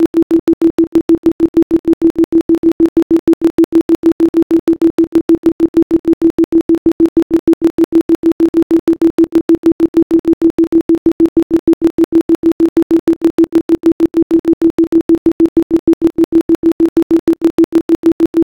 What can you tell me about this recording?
Volume oscillation2
A volume oscillation.